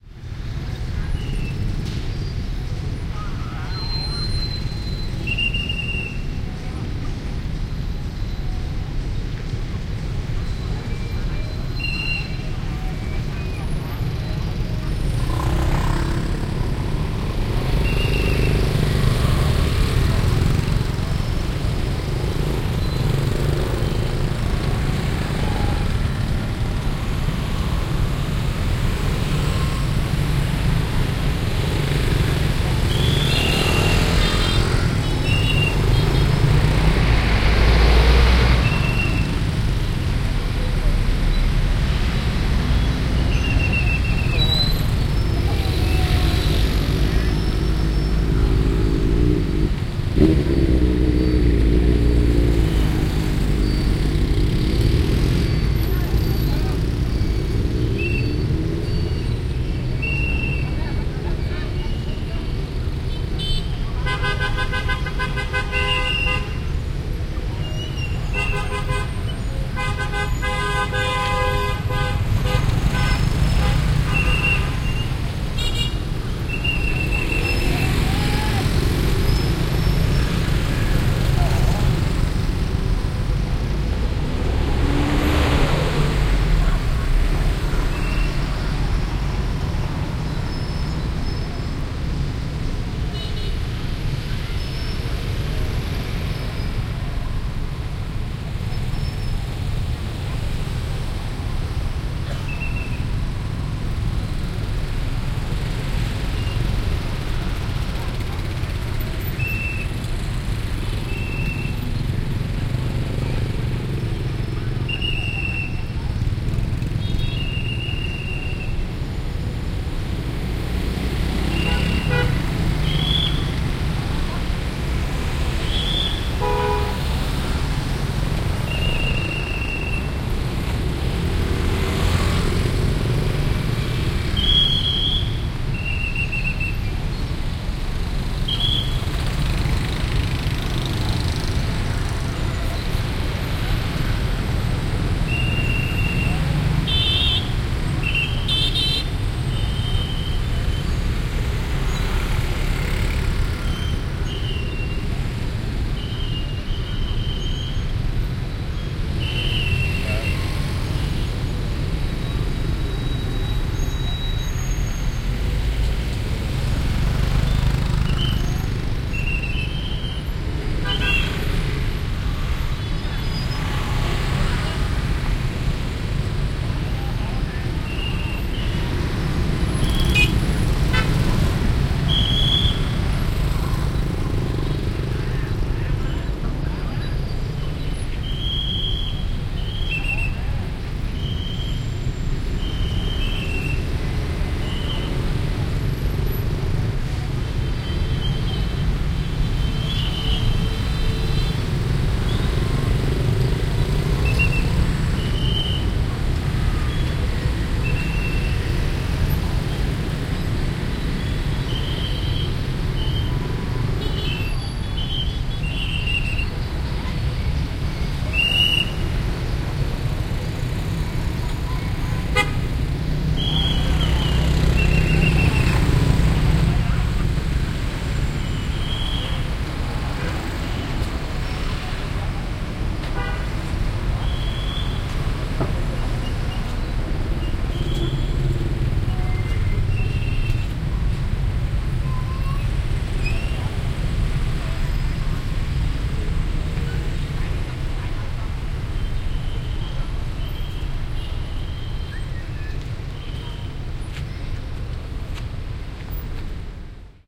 SEA 8 Cambodia, Phnom Penh, City Atmo, Heavy Traffic at Crossroad (binaural)
Heavey traffic at crossroad in Phnom Penh / Cambodia
Whistles of police men, honking, all kinds of vehicles passing,
binaural recording
Date / Time: 2017, Jan. 05 / 18h24m